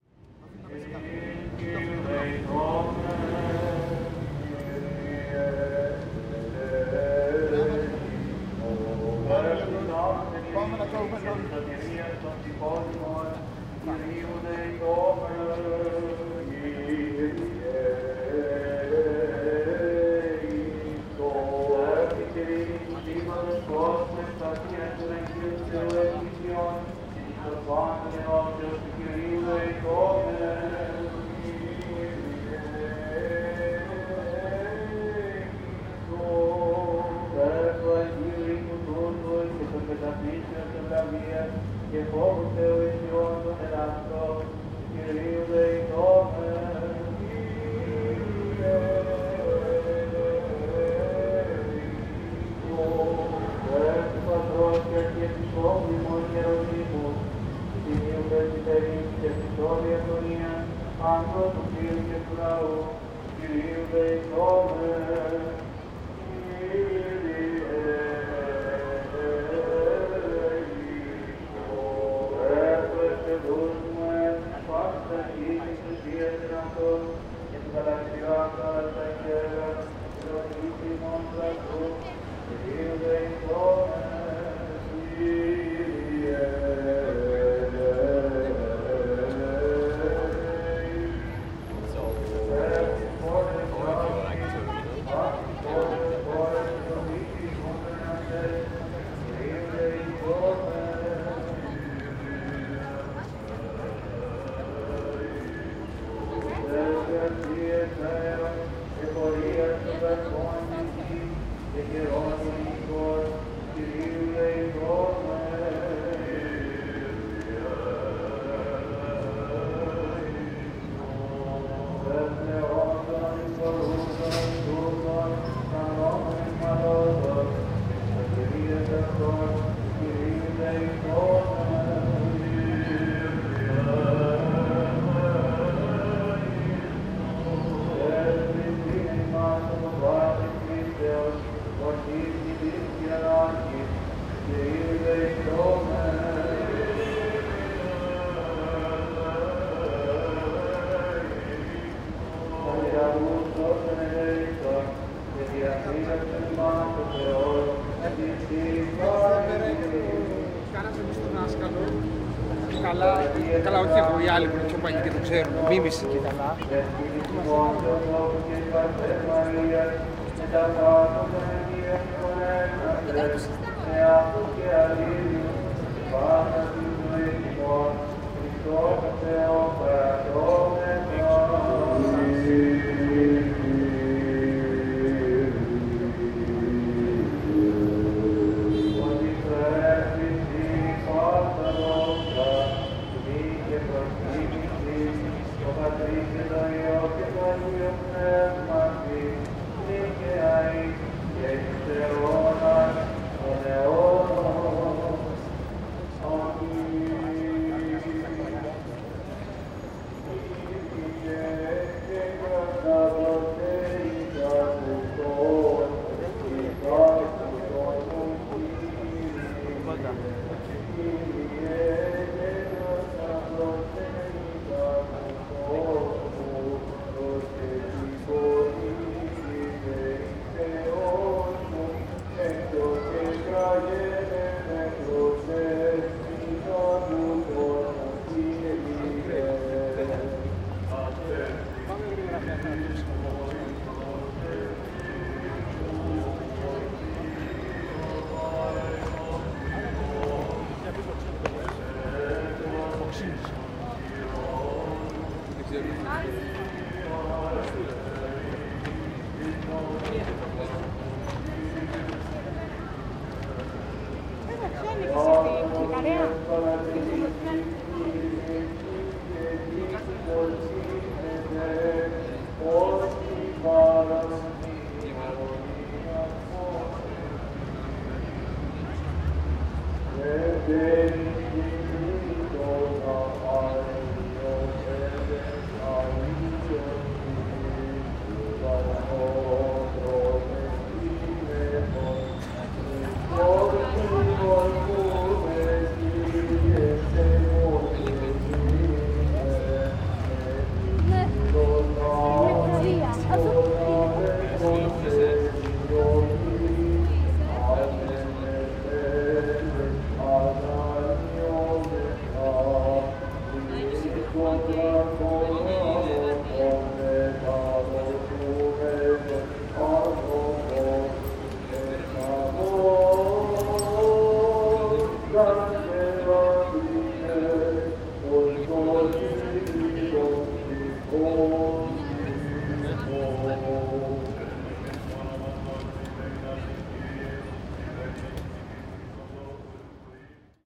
mass athens
Mono recording of ambiance on the Monastiraki square in Athens, Greece. You can hear the sound of a mass going on in the nearby church. Recorded with DPA-4017 -> Sonosax SX-R4.